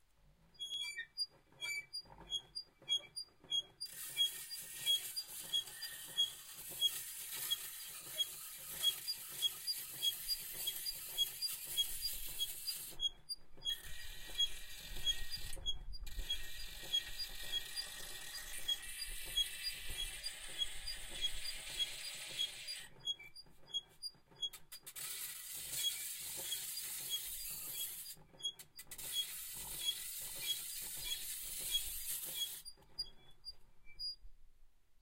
an old grindingstone